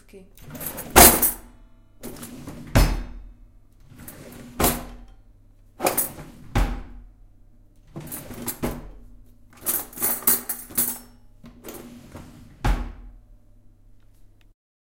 Opening and closing a drawer full of cutlery.
fork, knife, metal, spoon